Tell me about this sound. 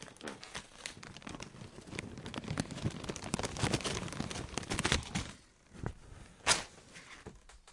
Close paper crumple and rip